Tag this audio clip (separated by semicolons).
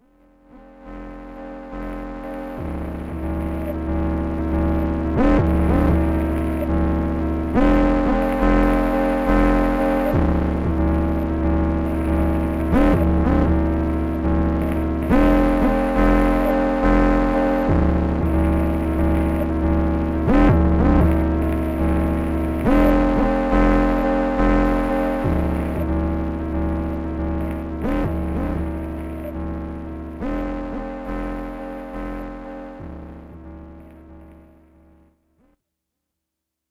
arturia; casio; drone; dronesound; microbrute; noise; roland; sk-1; sp-202; sp-404; synthesizer